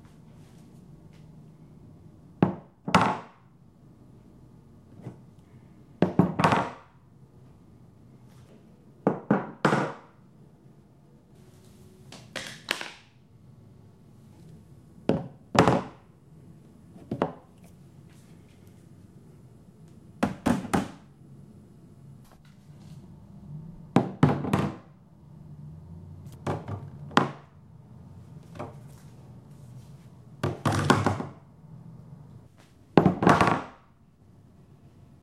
I set a hammer down on a variety of wooden surfaces, so that it could masquerade as someone setting down a big old fire axe in an audiodrama. (It does work to lower the pitch if you're looking for a bigger sound.) Mono recording, Zoom H4 internal mic.